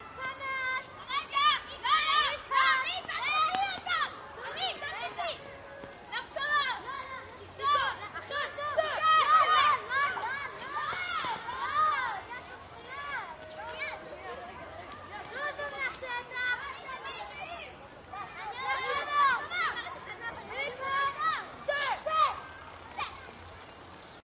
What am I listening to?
SCHOOLYARD HEBREW FG KIDS
Kids playing in an elementary school , Jerusalem , Israel . Recorded with AT822 mic , FR2LE recorder , and edited with Protools LE .
israel, schoolyard, hebrew, school